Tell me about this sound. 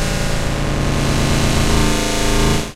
Patching with eurorack analog modular synth. No external effect or computers used here.